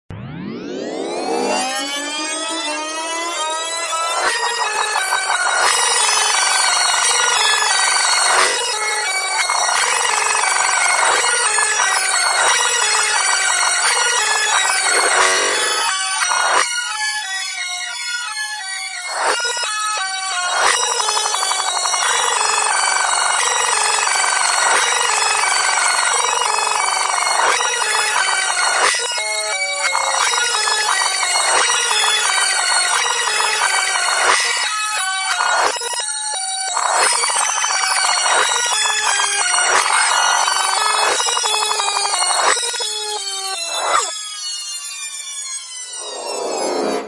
Long Rewind / Fast Forward
Fast-Forward, Rewind, VHS